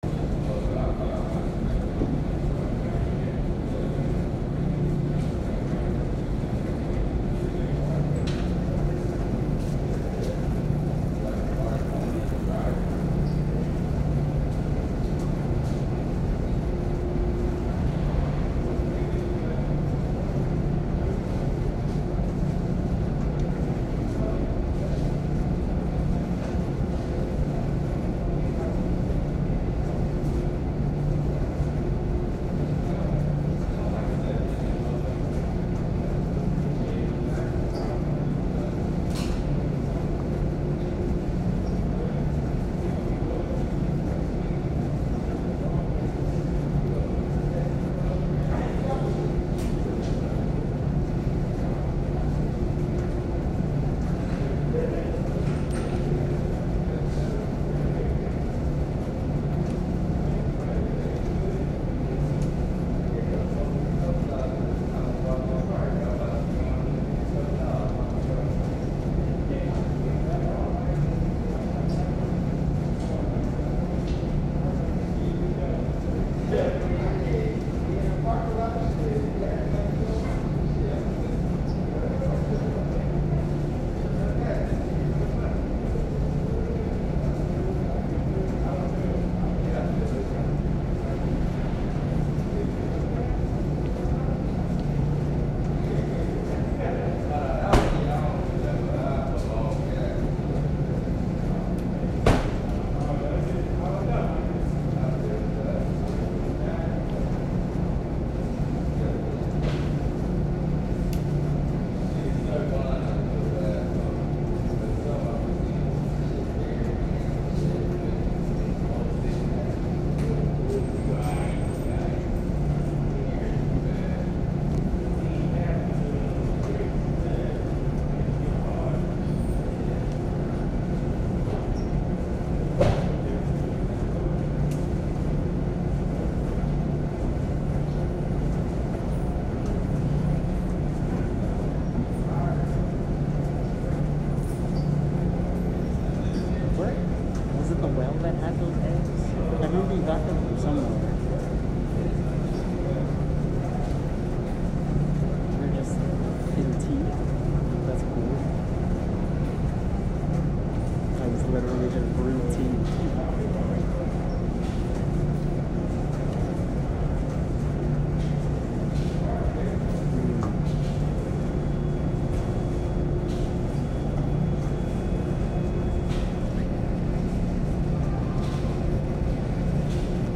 Room Tone - Laundromat at Night
This recording was recorded on an iphone. Centralized in the laundromat.
Minimal washer use, mainly dryers going.
There are some distant conversations happening and people walking past.